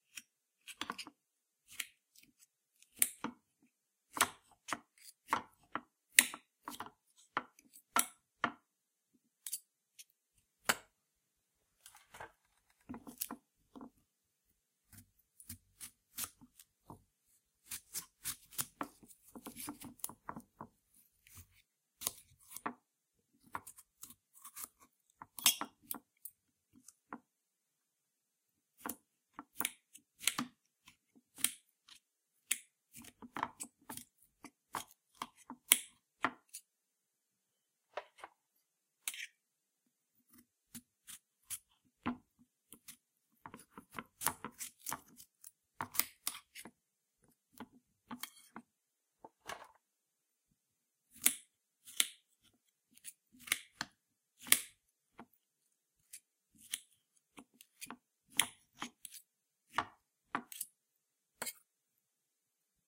Slicing a Melon on a Plate Diced
Honeydew Melon sliced cut and diced. Stainless steel knife screeches slips on china plate. Relaxed soft fruit cutting.